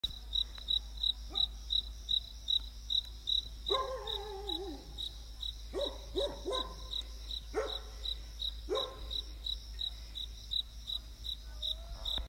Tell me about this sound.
Crickets chirping and dog barking
Crickets chirp while a dog barks